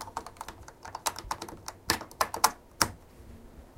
connecting USB headphones to my laptop. When played as a loop, it should be used as a rhythm. Recorded with Zoom H1 internal mic.
connecting, loop, USB